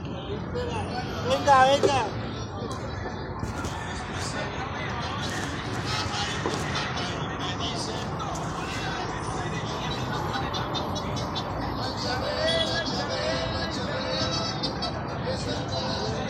recorrido3 lustrador zapatos SIBGA
Sonido de voz y musica de lustrador de zapatos, registrado en el Parque Santander, Cl. 36 #191, Bucaramanga, Santander. Registro realizado como ejercicio dentro del proyecto SIAS de la Universidad Antonio Nariño.
voice and music of polisher shoes